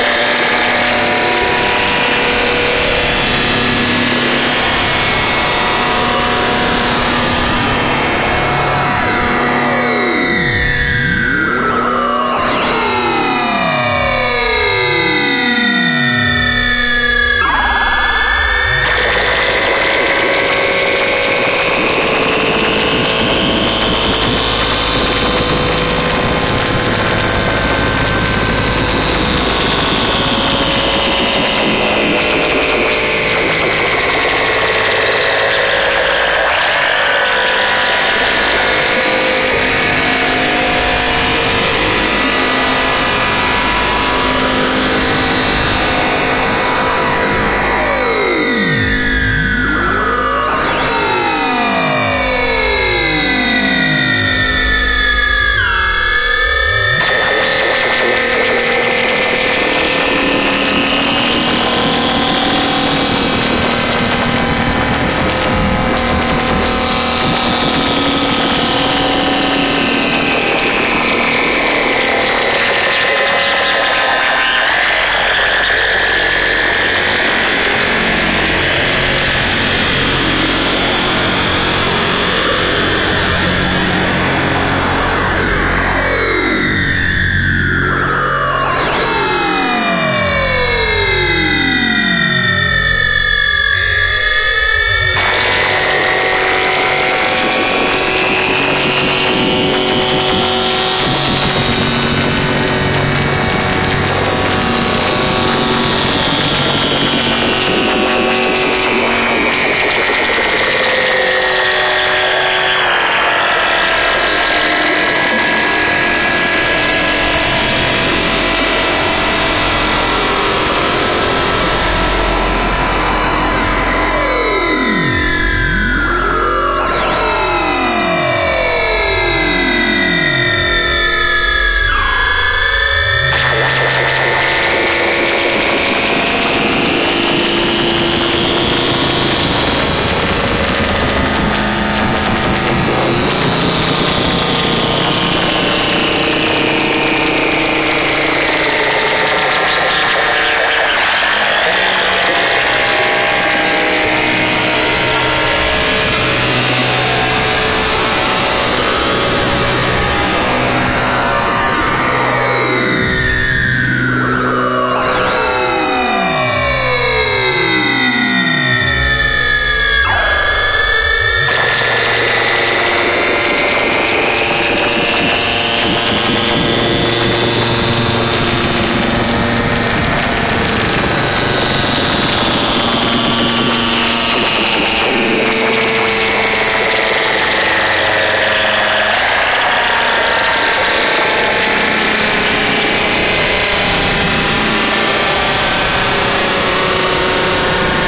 gravitational oscillator
digital, drone, droning, electronic, feedback, modular, noise, noisy, synth, synthesizer